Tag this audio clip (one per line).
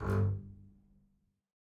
contrabass
fsharp1
midi-note-31
midi-velocity-95
multisample
single-note
solo-contrabass
spiccato
strings
vsco-2